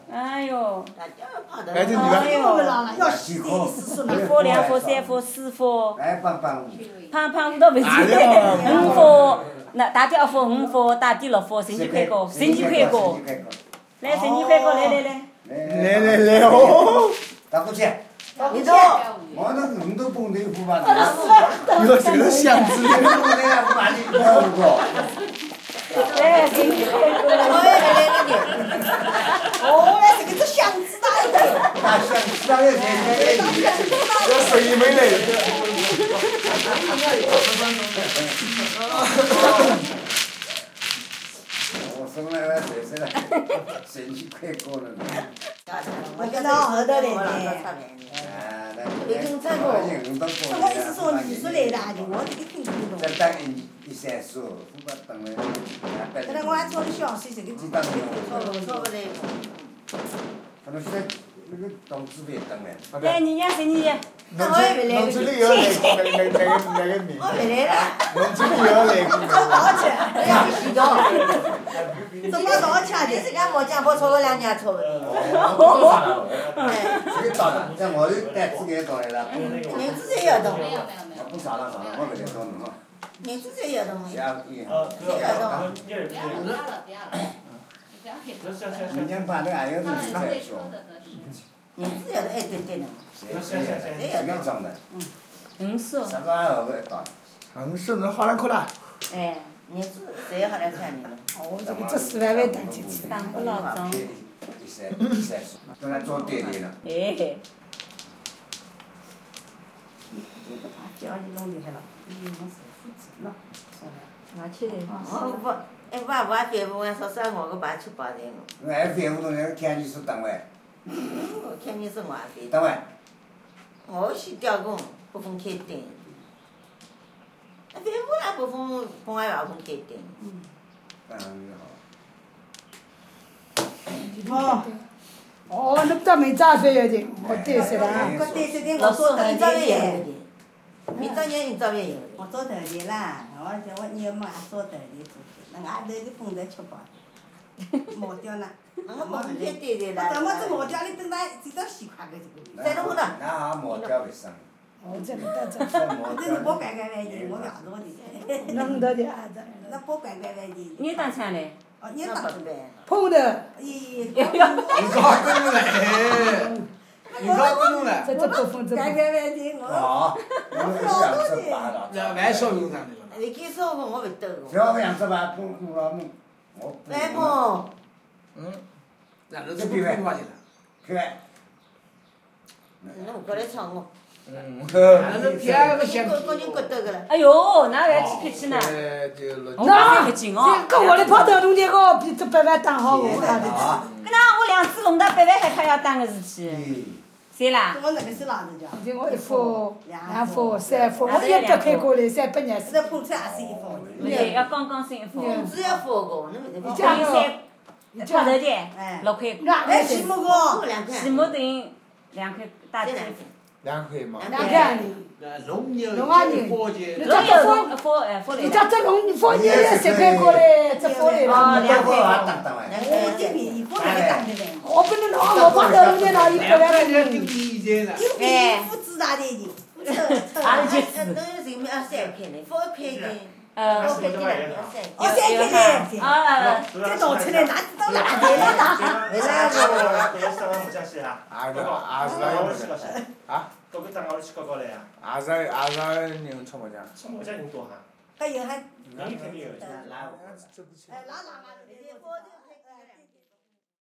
Sounds of Sunday afternoon Mahjong played by the local residents of a village near Shang Yu, Zhejiang Province, People's Republic of China. Money was definitely changing hands as the game progressed.
Sony PCM-D50